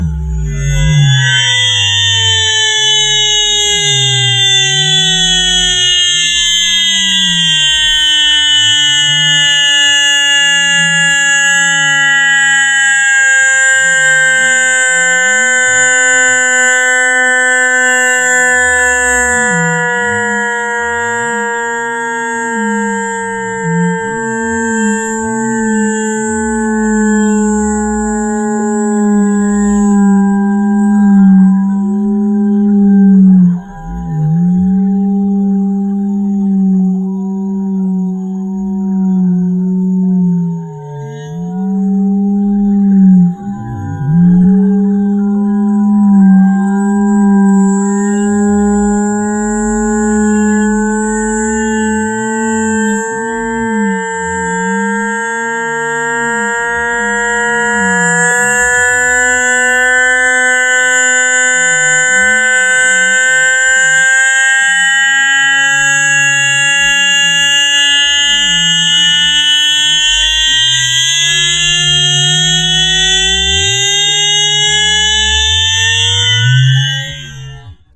When I heard a cat yelling angrily, I wondered how it would sound if you replayed the cat in slow motion. So I created a synthetic cat's warning yelling and slowed it down a couple of times.